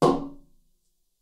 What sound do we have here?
Lofi snare sound recording of aluminium frame. Contact microphone recording with some EQ.
drum, snare, ghetto, lofi